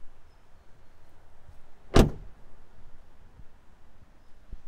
closing car door
close the door of a car, recorded from outside of the vehicle